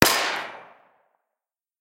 Single Distant Pistol Gunshot
A single pistol gunshot sound-effect artificially created with Audacity, made to sound like it's in the distance and available for use by anyone.
Distant, Firearm, Pistol